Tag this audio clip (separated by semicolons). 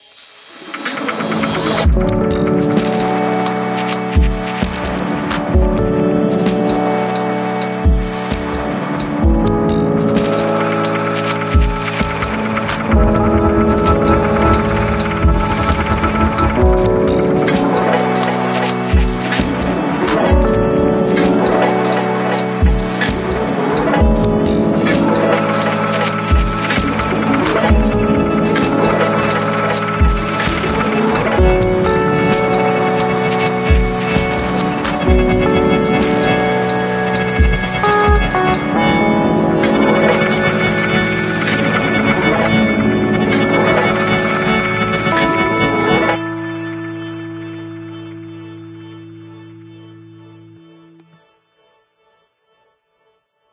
Soundscape; Beat; Glitch